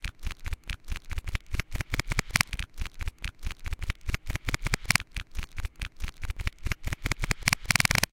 Pitched and Looped Sputter Top
Modified from a previously posted sound file, "Sputter Top", where I twisted a child safety cap on a pill bottle at differing speeds. This one pitched up a sample of that and then looped it for a "triplet" rhythm.
shift, rhythmic, pill, pitch, plastic